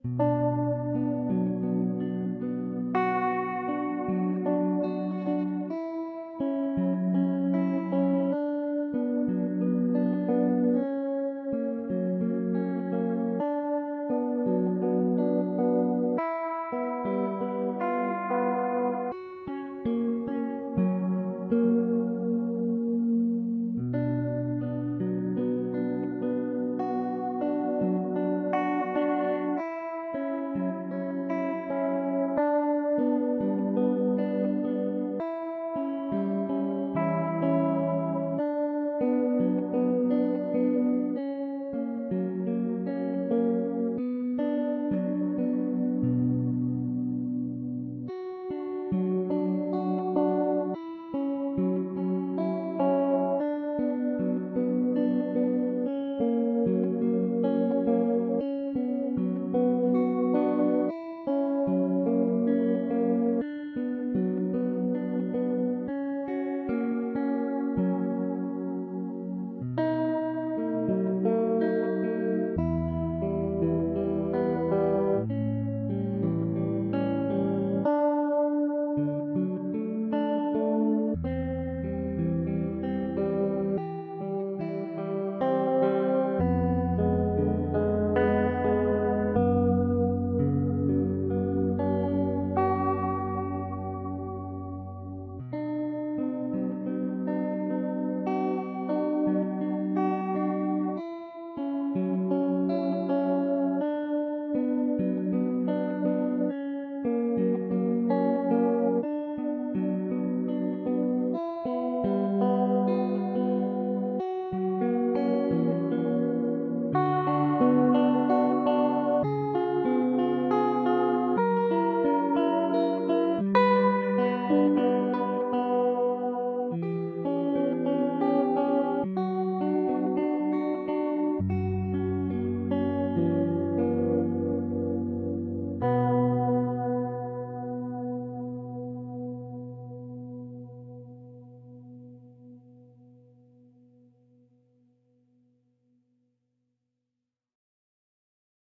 STUDY IN B MINOR-SLO (FS)
Recorded with my Epi SG through the Focusrite interface and Walrus Slo pedal on dream setting. Edited with Reaper and thanks to Kenny Gioia for the tips. Thanks. :-)
SETTING, GUITAR, DREAM, SLO, PEDAL